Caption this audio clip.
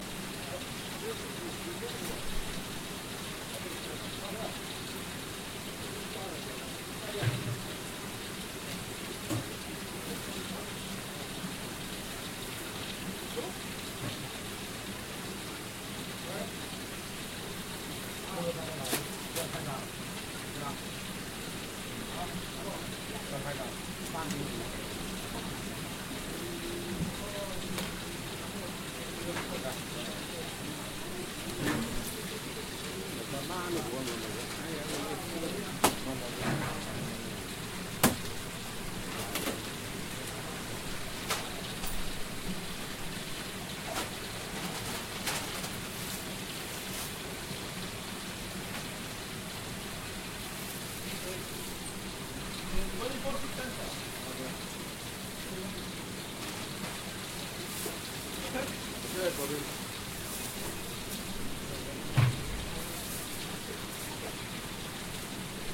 Chinatown Fish Market (RT)
Inside a fish market in Chinatown New York City
people, new-york, tone, market, city, store